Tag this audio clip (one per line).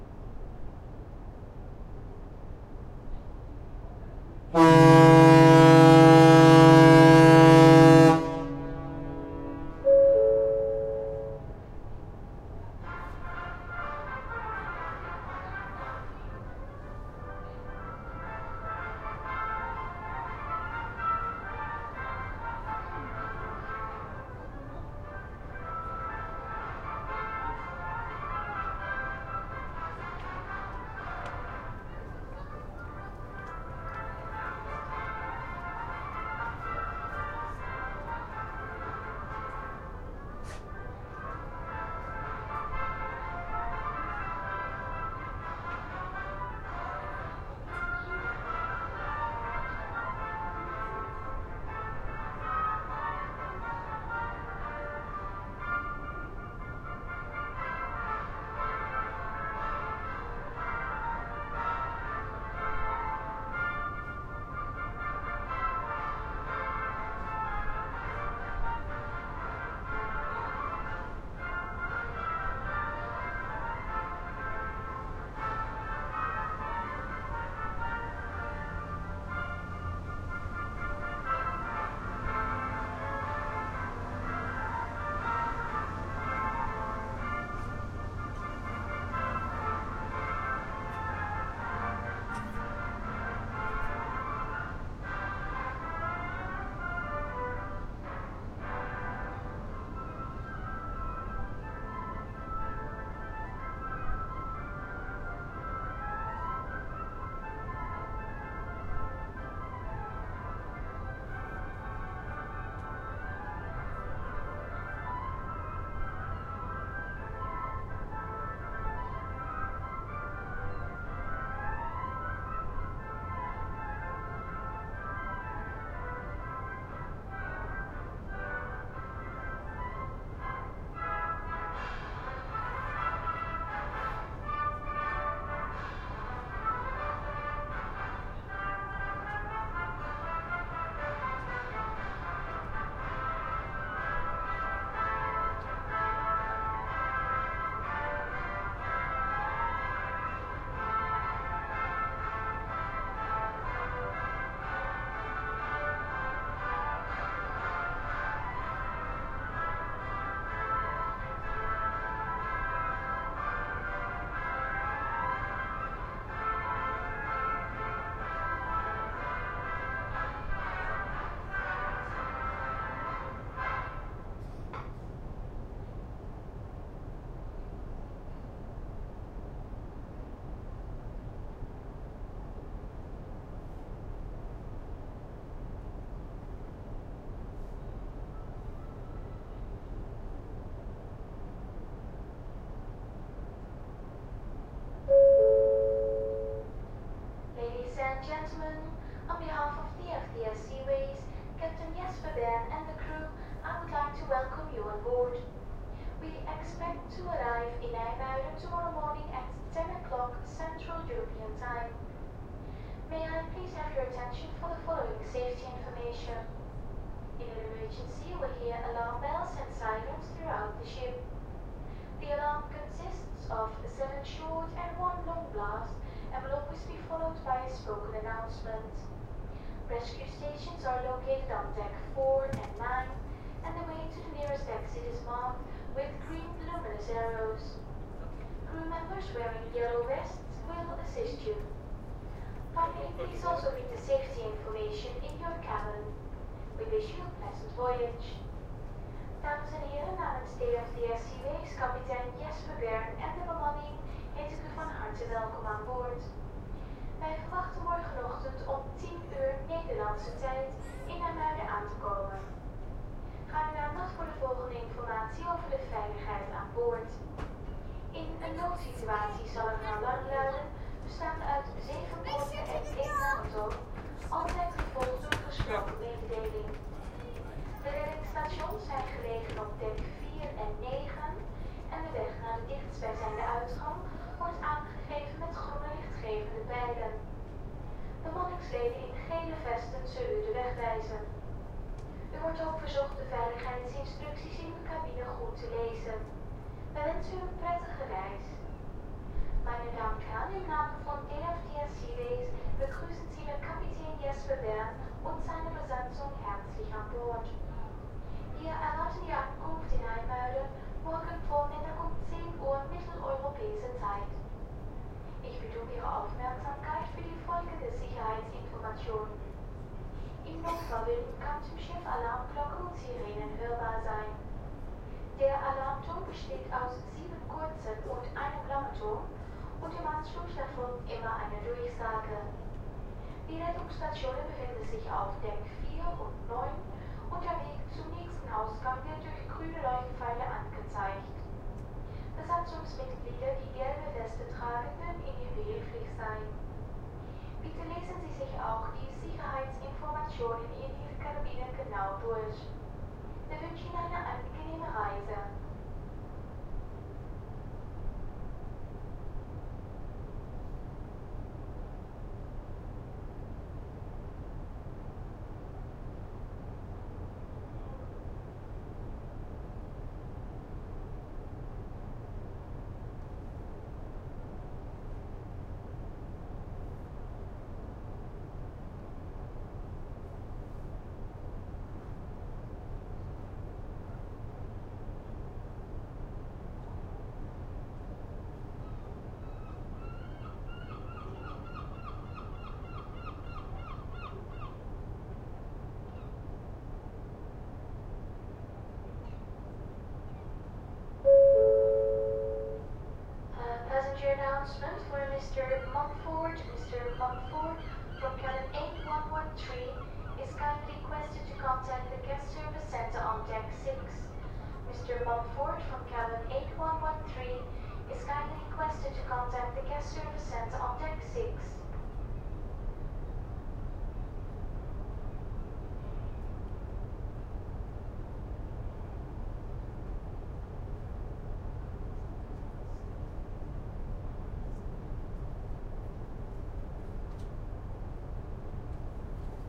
departure
ferry
field-recording
horn
music
ship
shipshorn
siren